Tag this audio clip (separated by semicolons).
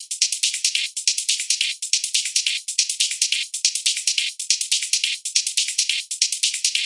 beat; electronica; loop; dance; processed